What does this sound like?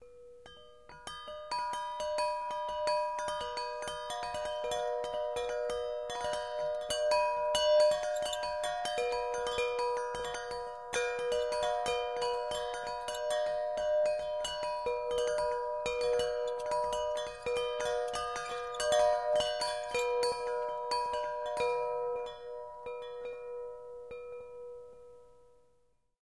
wind chimes - rough
Shaking wind chimes indoors.